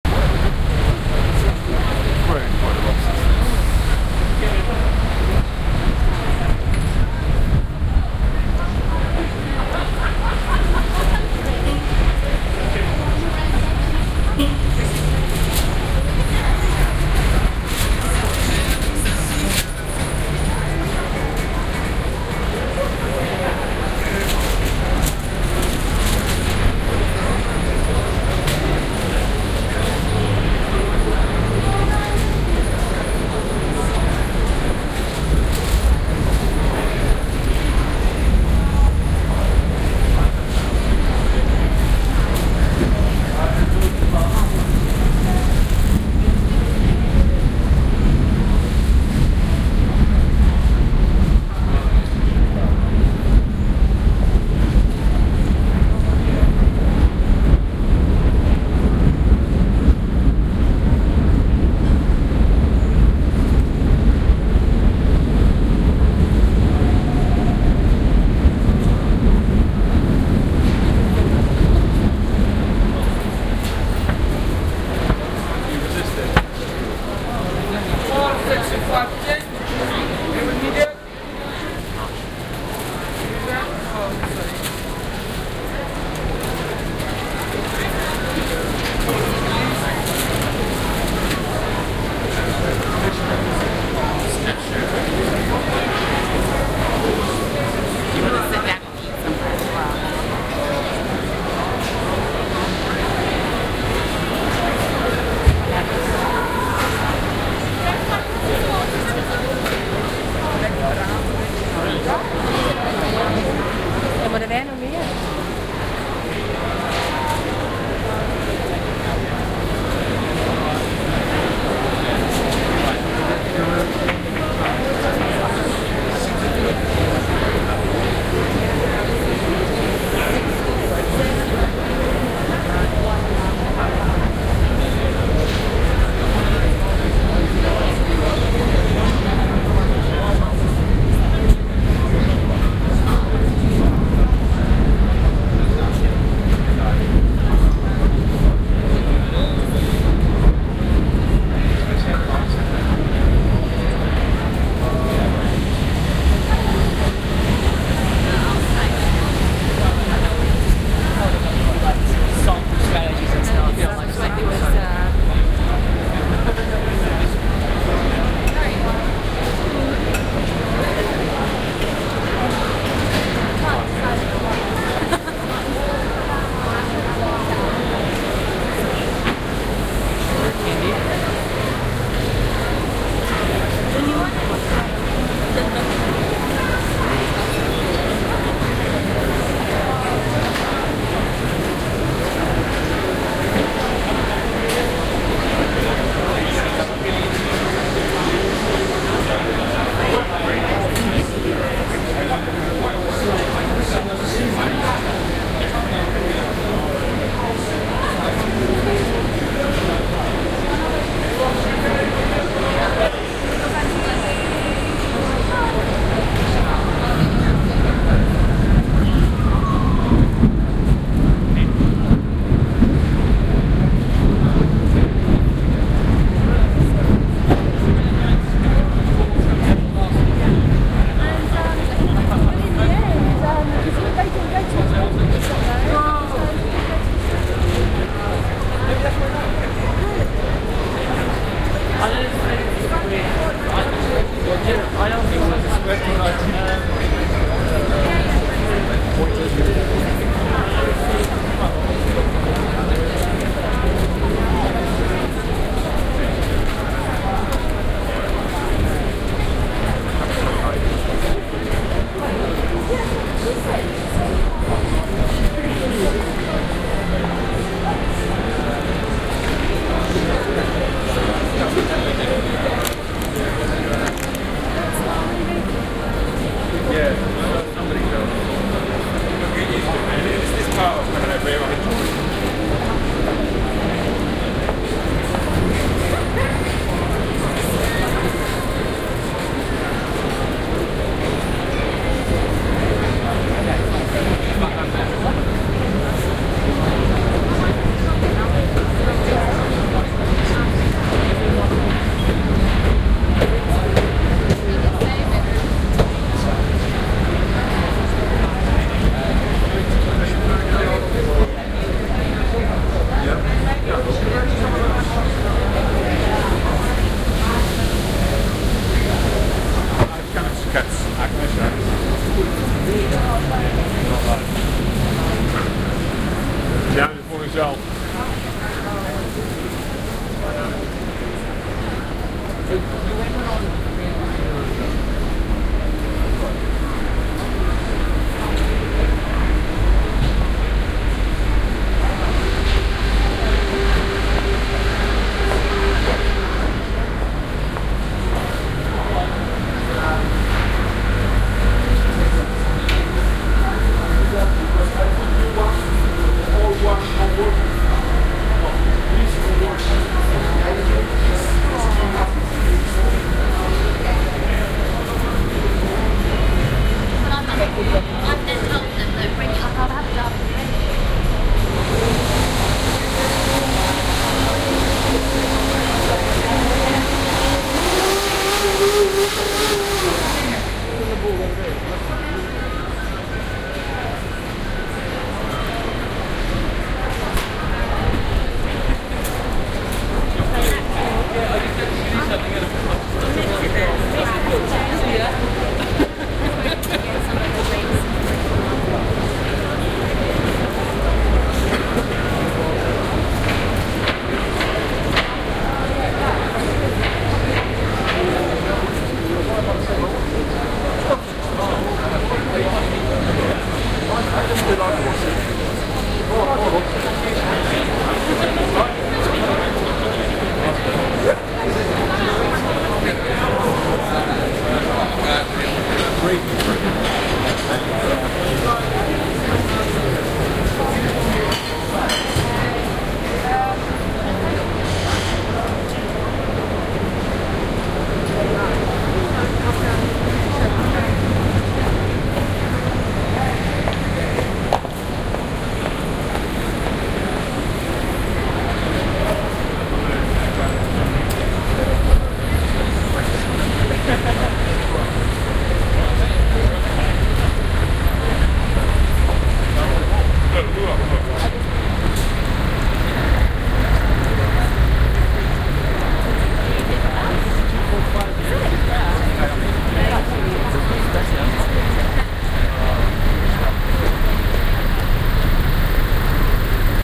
Borough - Borough Market